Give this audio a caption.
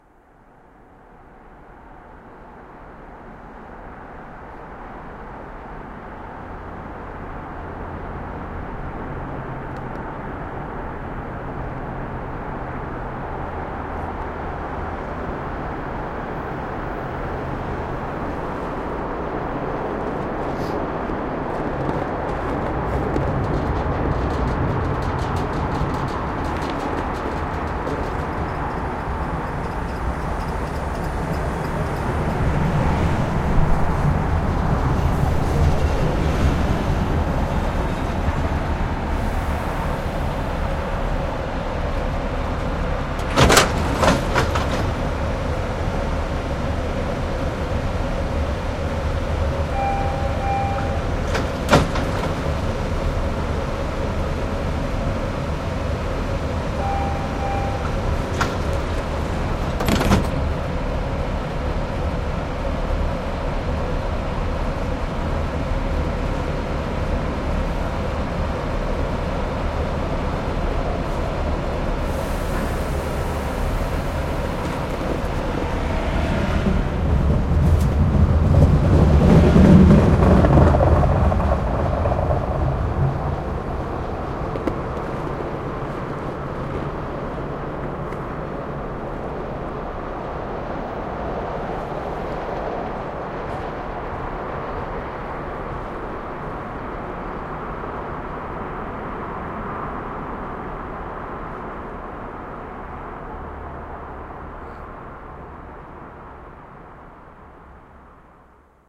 E 34 and Campus
sound of a train going by
ambiance, city, field-recording